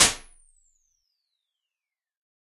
Impulse response of a 1986 Alesis Microverb on the Small 1 setting.